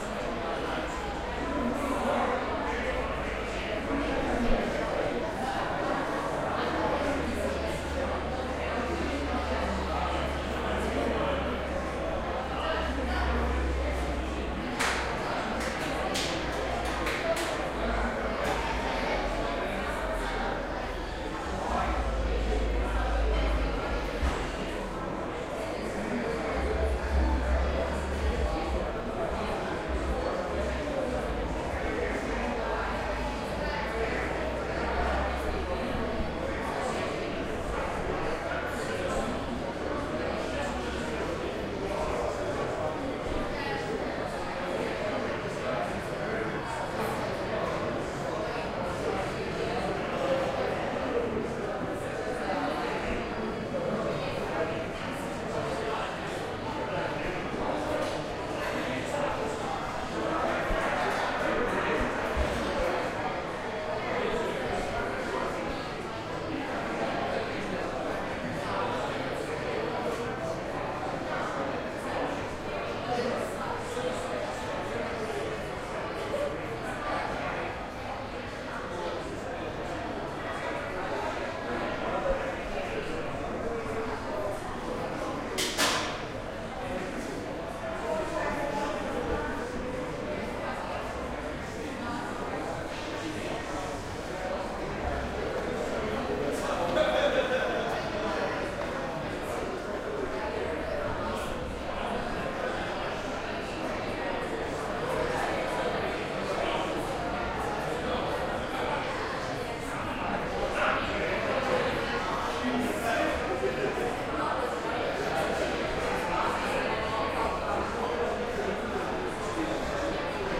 Restaurant ambience
This was recorded with an H6 Zoom recorder at a bar called 28 degrees thirst and thought it would work well for a restaurant, bar or any similar public setting for background noise.
atmosphere, ambience, atmospheres, general-noise, background, field-recording, soundscape, public, OWI, roomtones, ambiance, people, roomtone, ambient